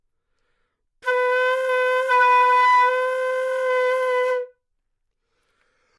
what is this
Flute - B4 - bad-richness
Part of the Good-sounds dataset of monophonic instrumental sounds.
instrument::flute
note::B
octave::4
midi note::59
good-sounds-id::3174
Intentionally played as an example of bad-richness
B4, neumann-U87, flute, good-sounds, single-note, multisample